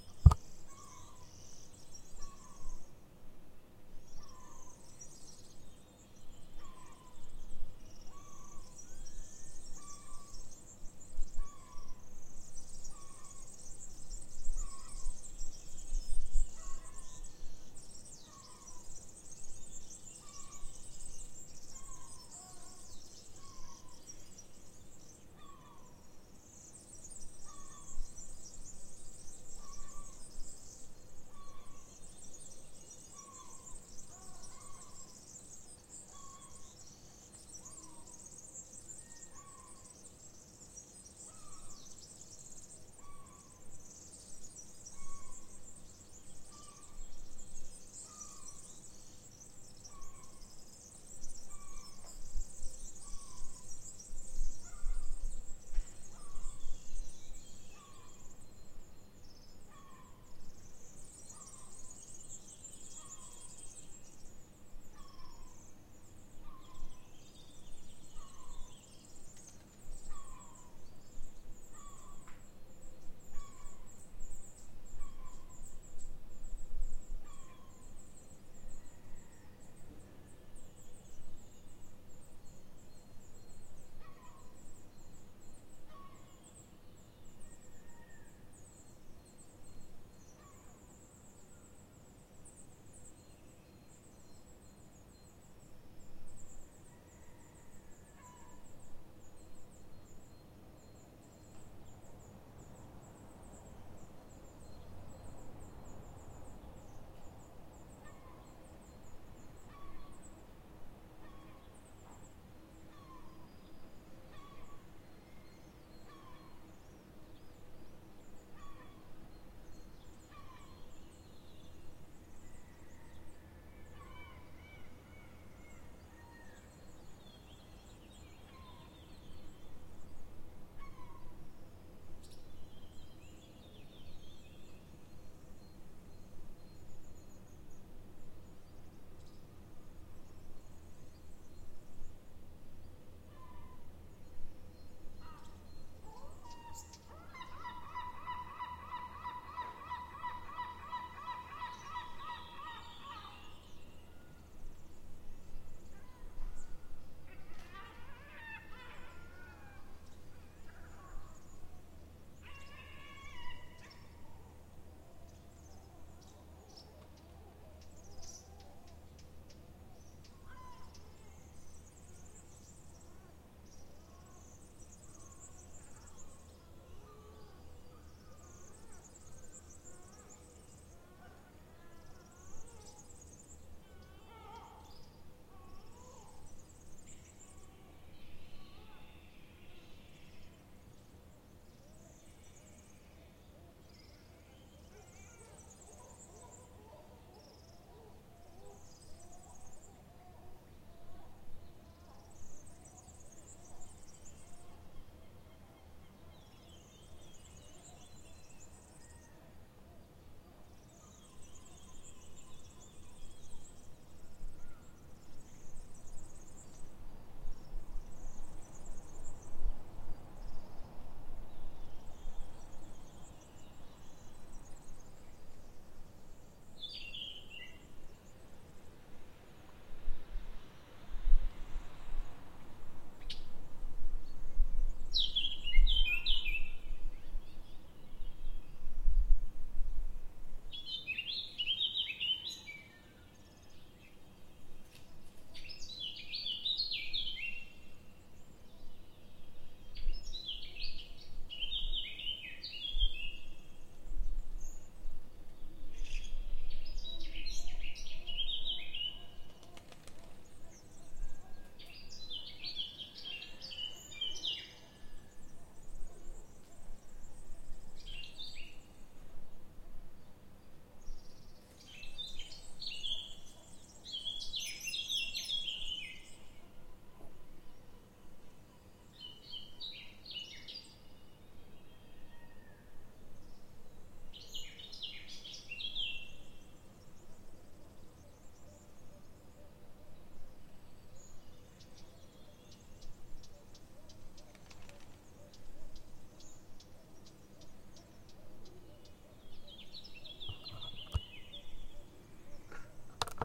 2020 March 27 Portugal Rua do Sao Vicente Graca Lisbon 0700h Morning Ambience
Morning ambience. First floor. Window, facing the sea at a distance. Surrounded by houses on all sides, a small garden of the neighbour below.
2020, Ambience, Birds, ColectivoViajeSonoro, Coronavirus, Cratila, Graca, Lisbon, Morning, Portugal, Quarantine, SoundScapesFromWindow, WLD2020, field-recording